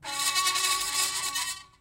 baby sqeak
recordings of a grand piano, undergoing abuse with dry ice on the strings
scratch, dry, torture, ice, piano, abuse, screech